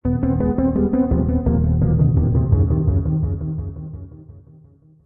A creepy diminished digital short scale created with Pocket Band for Android